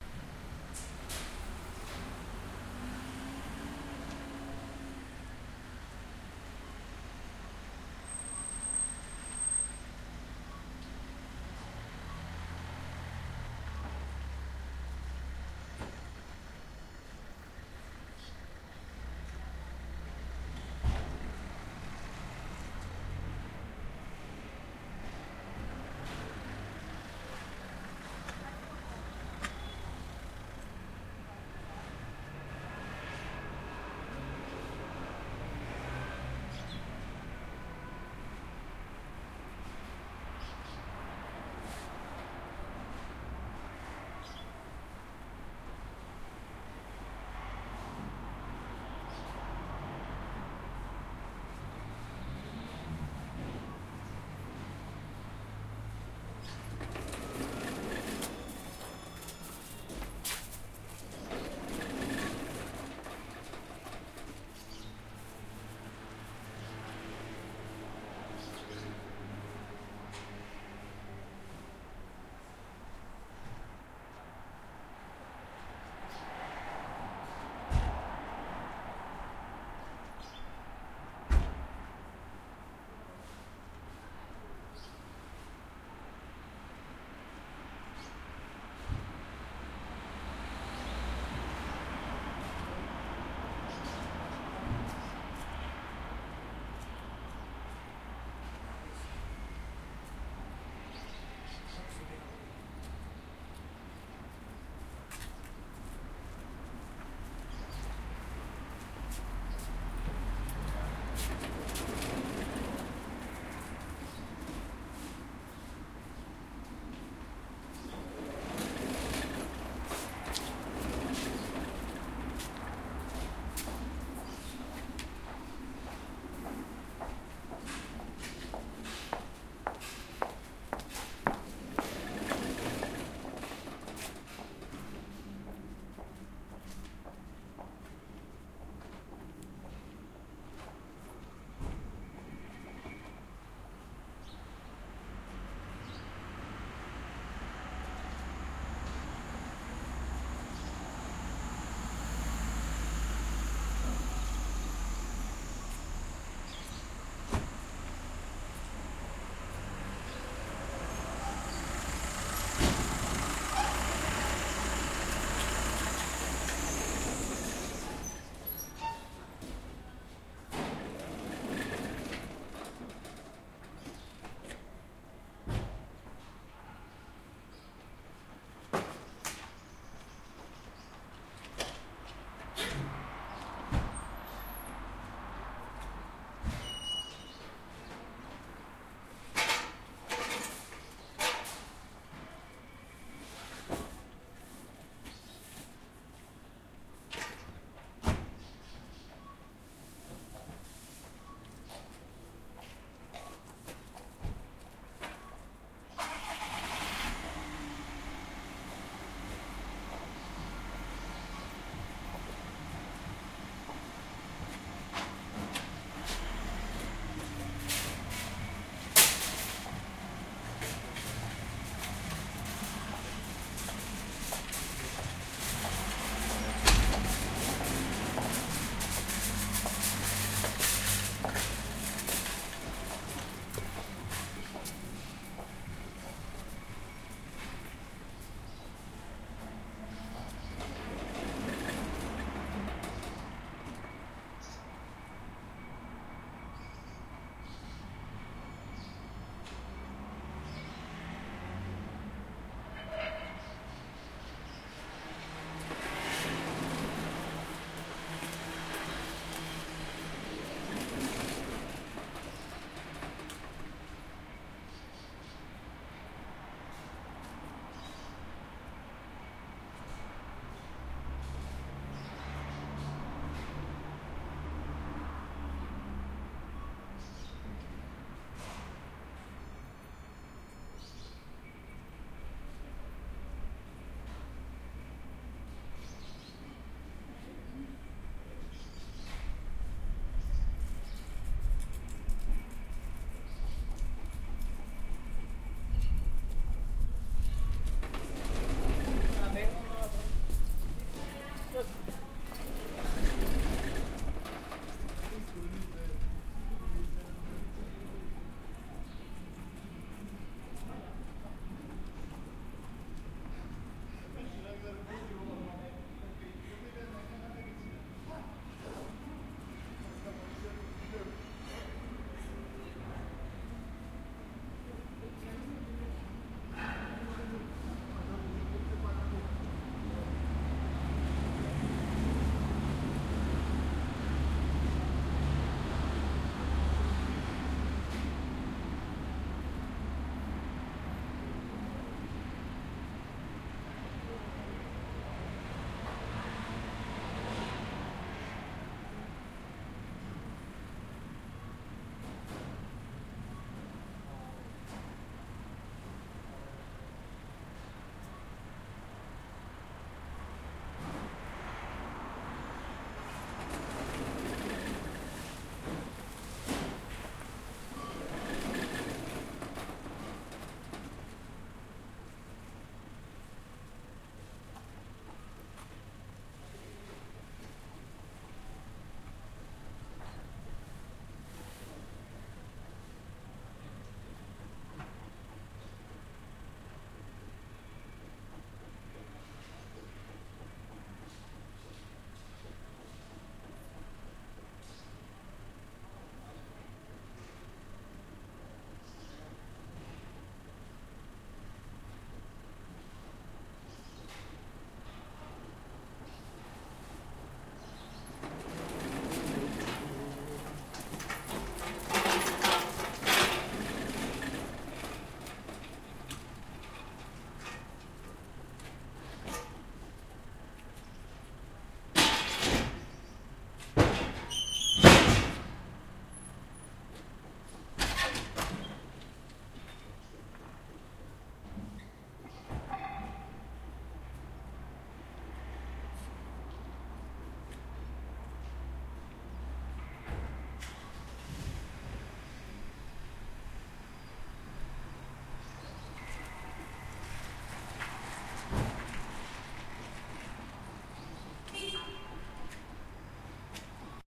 ambiance sonore magasin
sound in a market with sound of walking people, doors and electronic sound of machines.
people, soundscape, walk, market